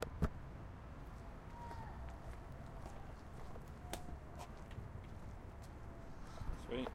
Running through puddles.